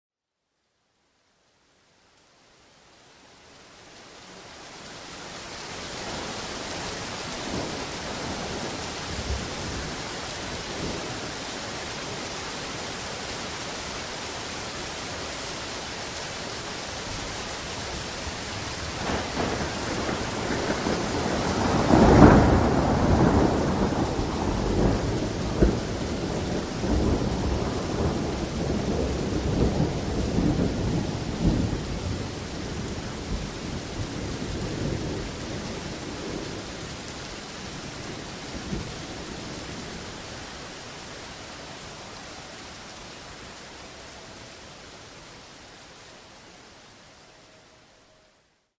TEPC THUNDERCLAP RAIN v2 14082014
Another clap of thunder during a heavy rain storm in London. Recorded on a Zoom H1 at 9600bps 48Mhz
urban, thunder, field-recording, morning, zoom